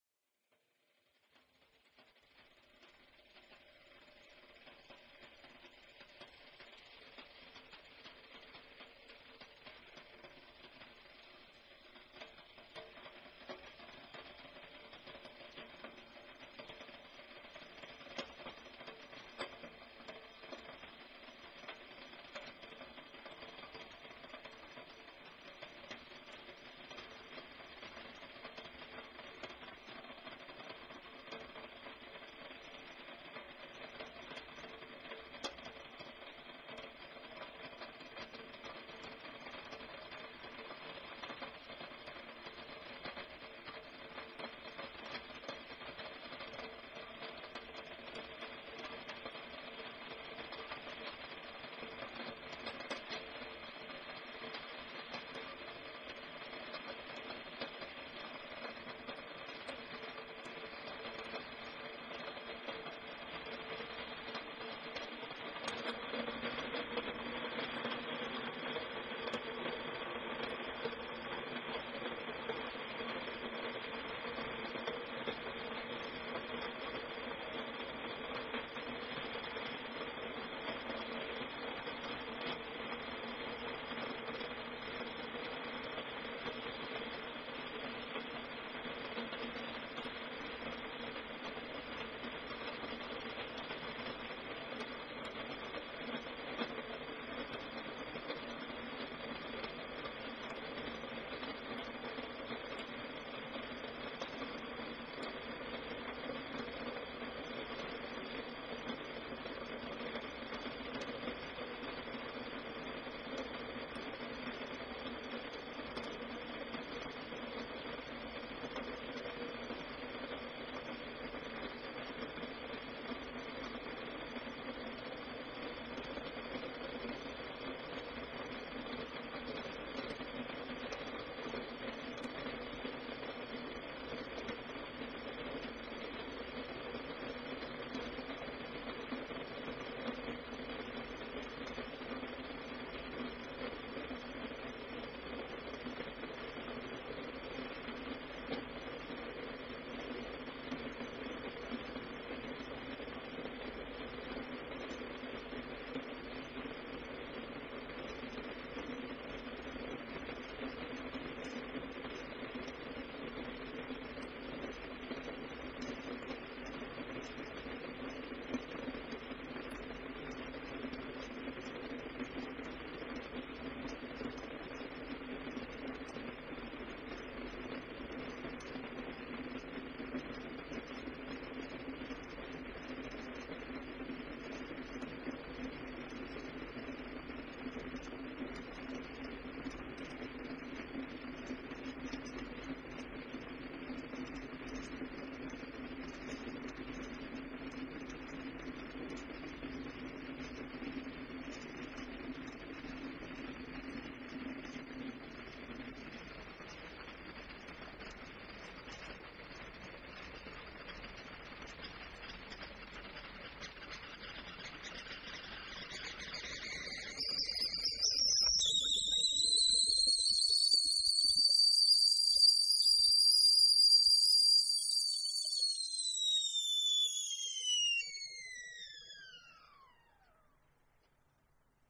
Tea kettle htng to whistle elec range
Tea kettle on electric range heating to boil-whistle
boiling, kettle, steam, whistle